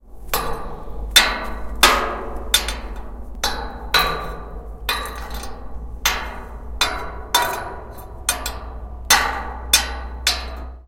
banging pipes
field notes, sounds of metal crashes
beating, ambient, metal, industrial, experimentation